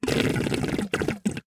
various sounds made using a short hose and a plastic box full of h2o.